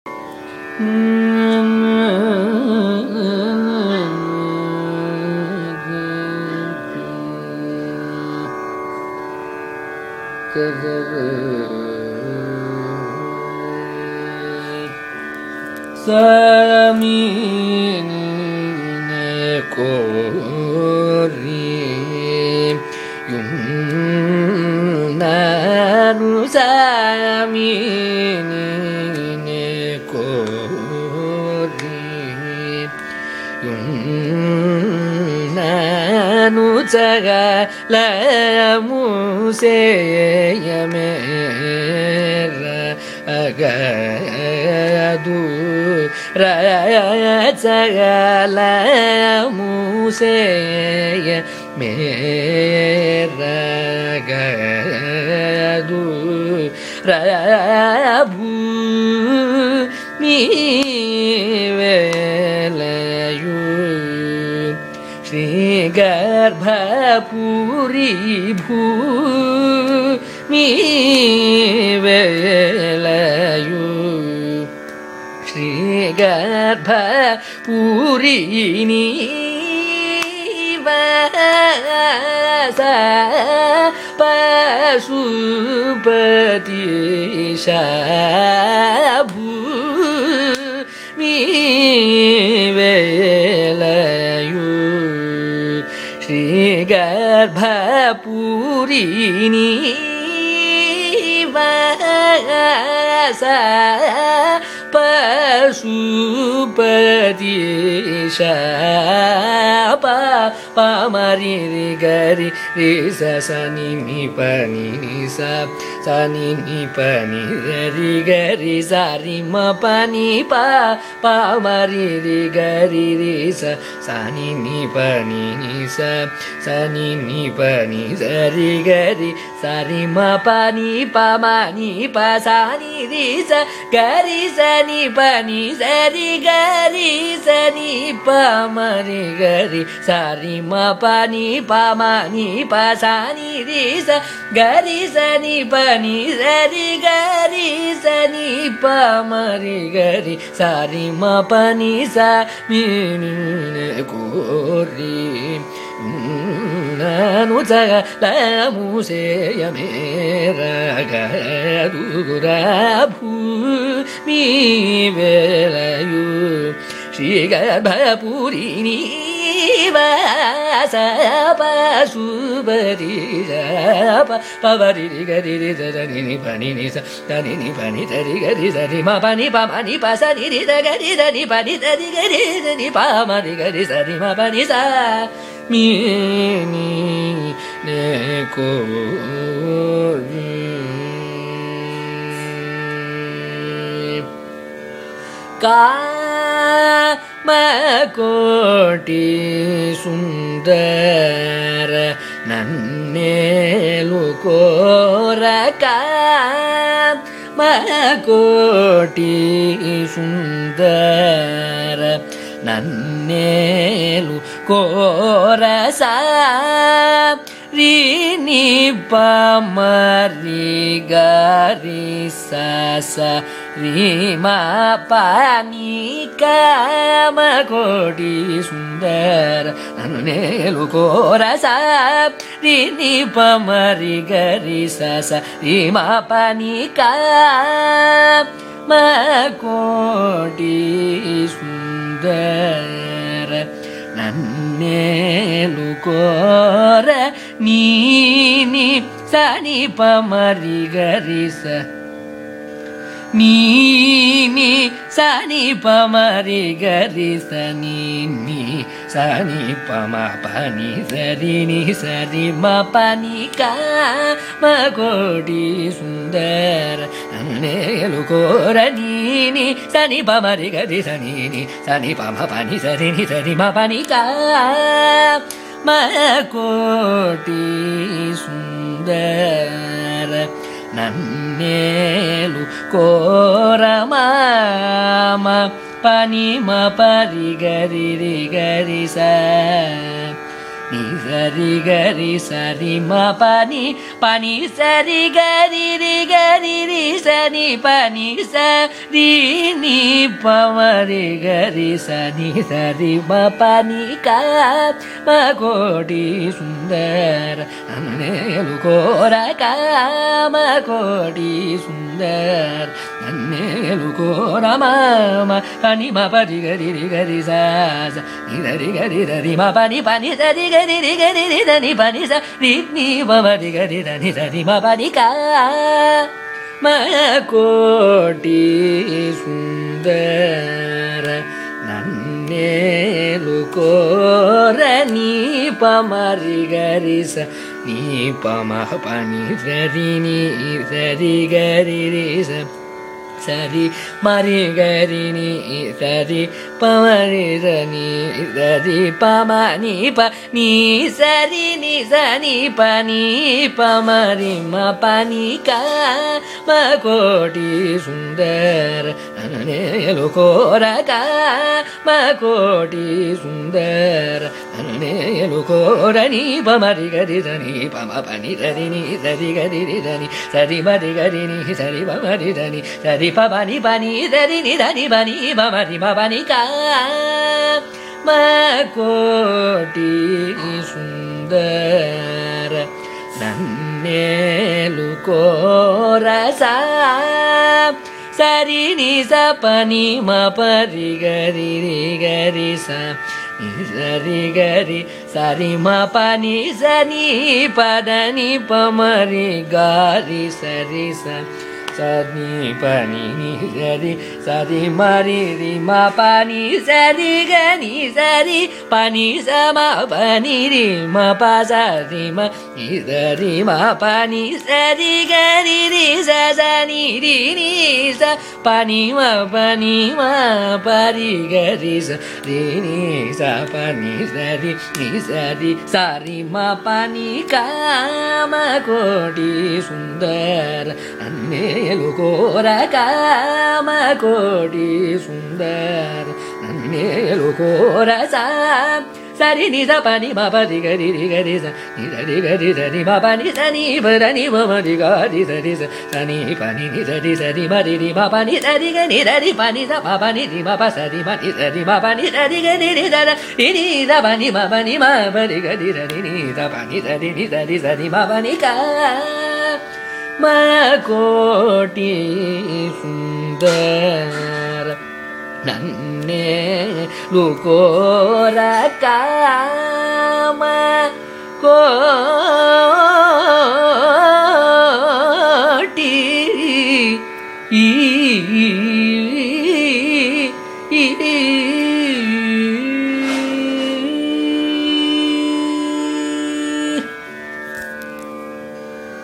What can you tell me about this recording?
Carnatic varnam by Ramakrishnamurthy in Sri raaga
Varnam is a compositional form of Carnatic music, rich in melodic nuances. This is a recording of a varnam, titled Saami Ninne Koriyunnanu, composed by Karoor Devudu Iyer in Sri raaga, set to Adi taala. It is sung by Ramakrishnamurthy, a young Carnatic vocalist from Chennai, India.
varnam, carnatic-varnam-dataset, carnatic, iit-madras, compmusic, music